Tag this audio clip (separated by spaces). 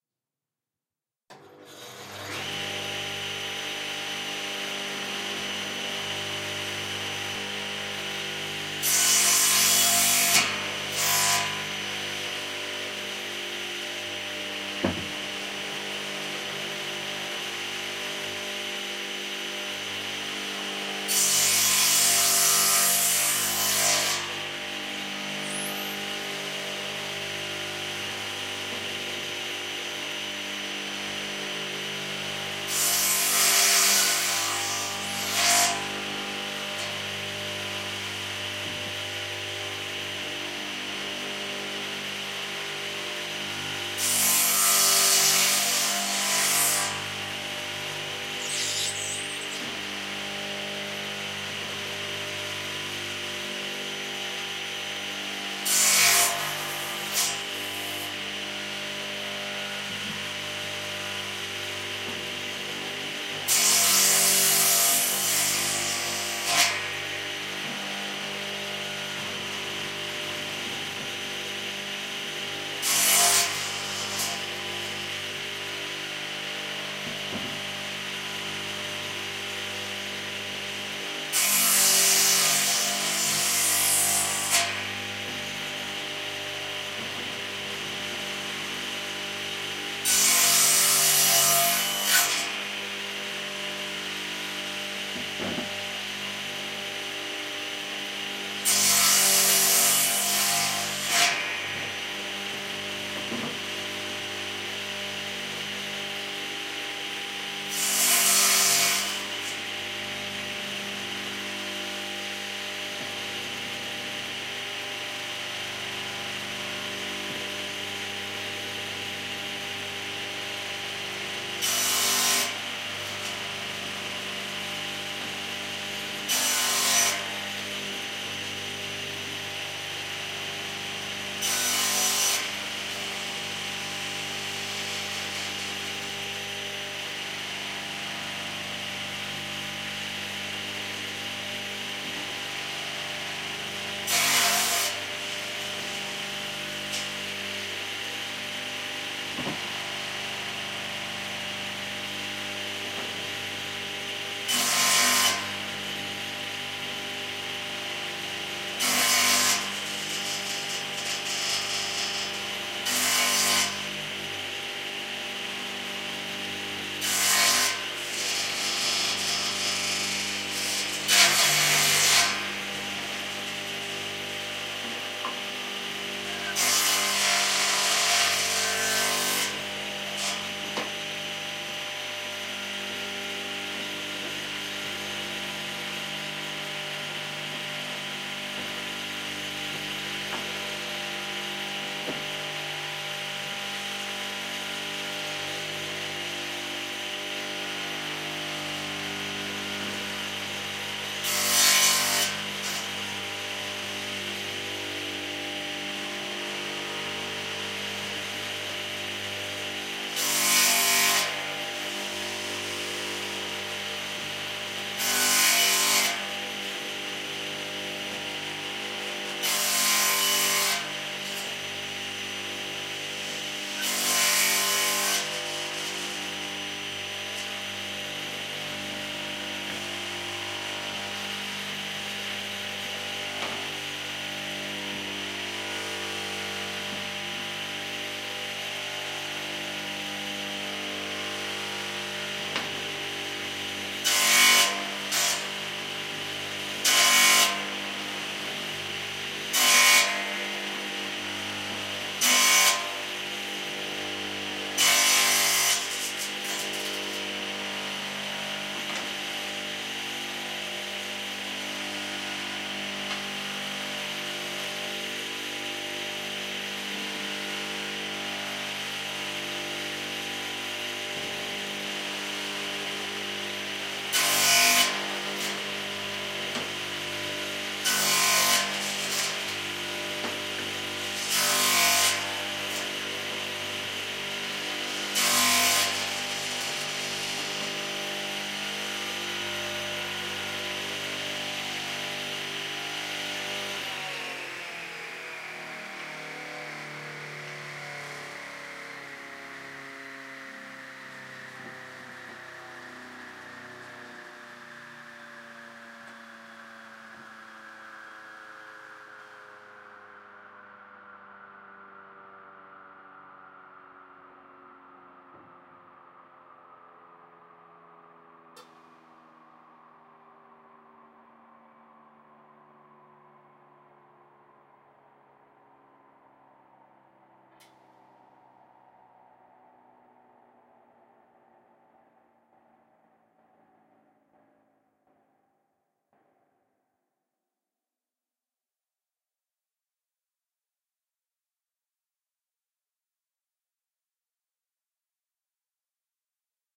circular circular-saw electric joinery woodwork